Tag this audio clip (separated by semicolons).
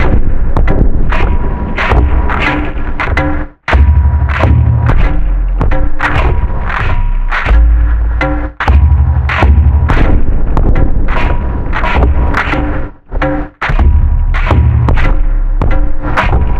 crush; africa; weed; deep; drum; distortion; slow